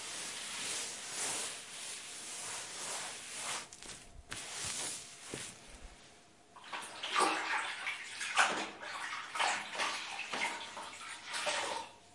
wiping the floor